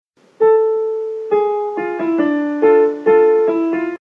Playing the Piano
Foley, Piano, Sound